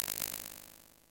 part of drumkit, based on sine & noise